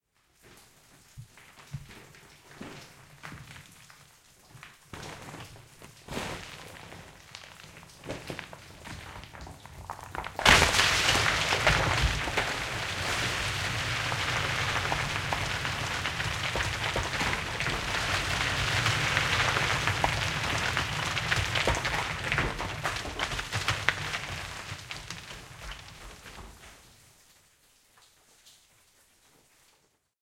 Rockfall in mine
Stereo field recording. While entering a chamber inside an abandoned lead mine, my foot dislodged a rock and started a slide amongst some spoil beneath me. Recording chain Rode NT4>FEL battery pre amp>Zoom H2 line in.
mine, field-recording, rockslide, falling, landslide, tumbling, xy, cavern